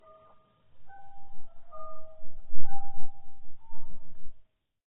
Creepy Bells
A manipulation of a field recording of a wind chime. Recorded using a Zoom H4n and a Rode NT4.